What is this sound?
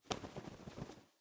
bird flapping 10
Various bird flapping
bird, flapping, wings